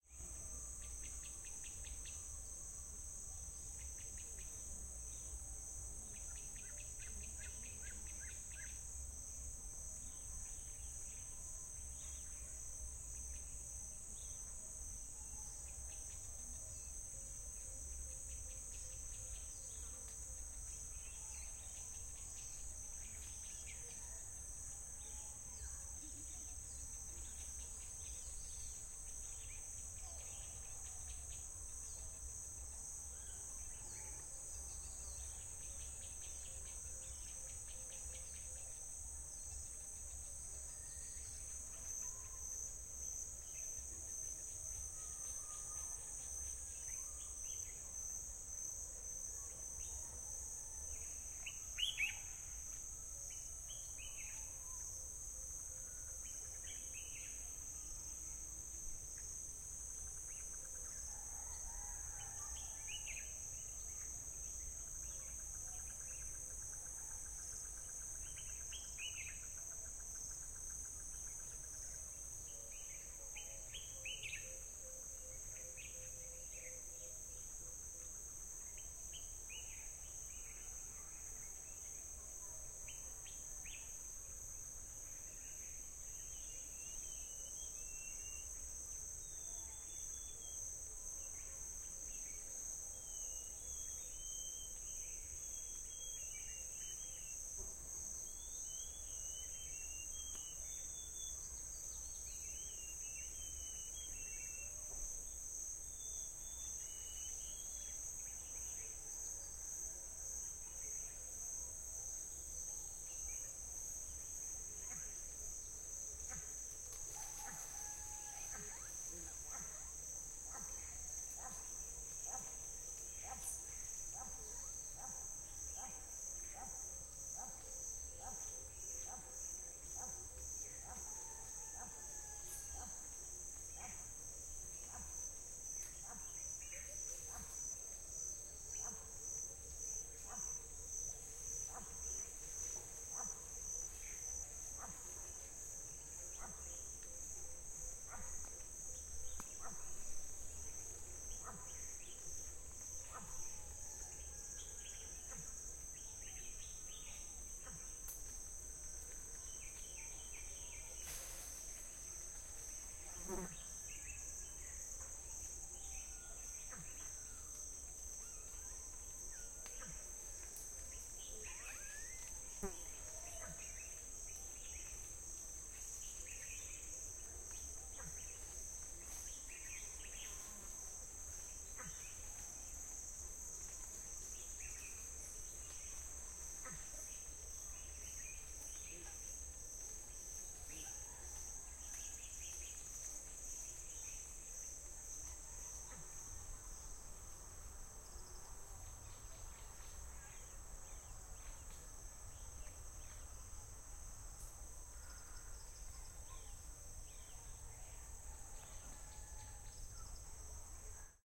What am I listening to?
Rural Ambiance of birds and bugs and some distant voices, Biakpa, Ghana